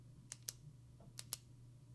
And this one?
Pen Clicks-GAIN 01
Retractable pen click with a slight increase in gain